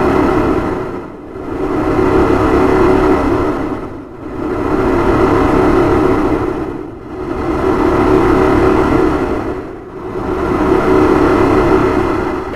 Electric noise 03
The curve has been drawed in Audacity and edited
computer, automation, mechanical, electronic, space, machine, robot, android, robotic, droid